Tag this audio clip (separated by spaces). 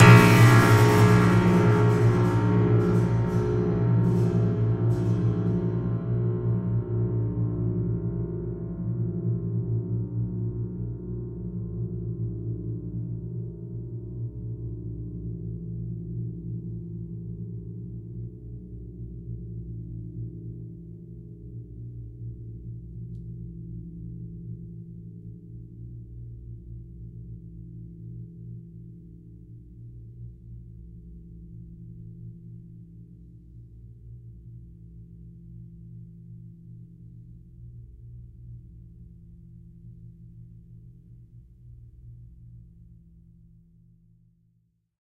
horror shock stinger